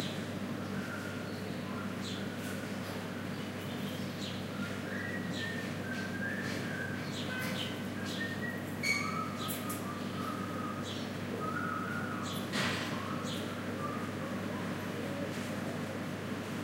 a man whistling on a background of street noise, distant traffic rumble
ambiance, canary, whistling